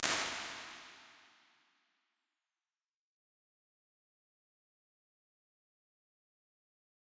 IR ST Parking Garage 03
A digitally modelled impulse response of a location. I use these impulse responses for sound implementation in games, but some of these work great on musical sources as well.
acoustics, convolution, echo, impulse, IR, response, reverb, room, space